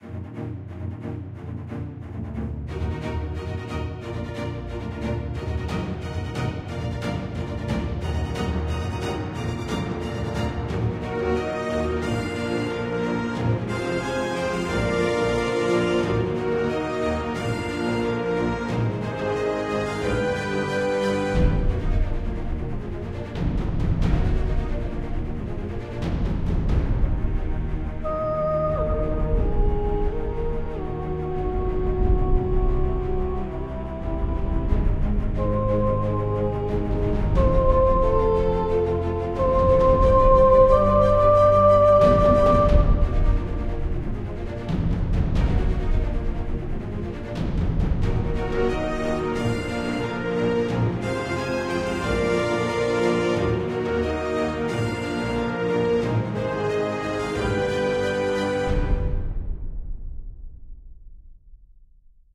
A song I made that can be used as a soundtrack
soundtrack, theme, epic, trailer, movies, song